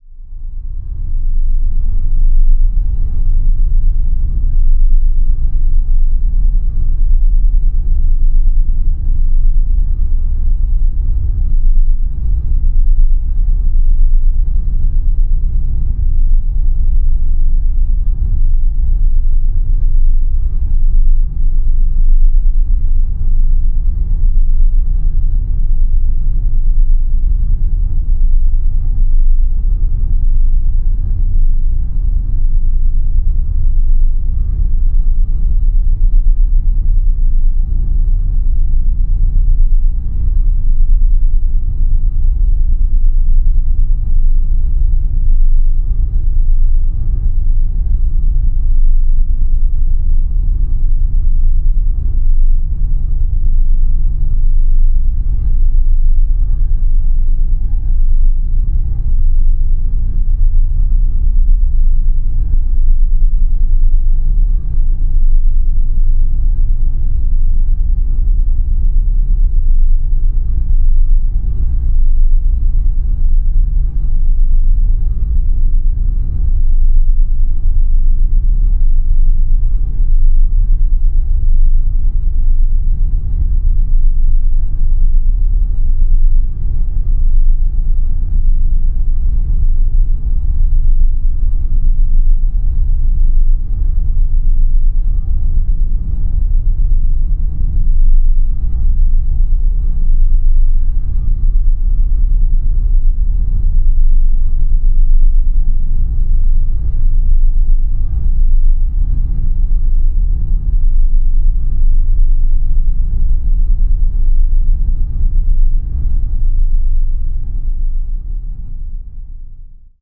This sample is part of the "Space Machine" sample pack. 2 minutes of pure ambient deep space atmosphere. Low frequency drone.